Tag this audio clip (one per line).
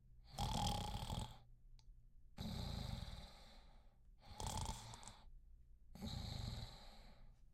carraspeo
ronquido
sleeping
snoring